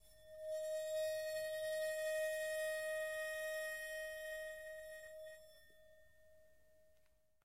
drone7 bowed cymbal3
Yet another sound of a bowed cymbal.
bowed, drone, metal, cymbal, squeak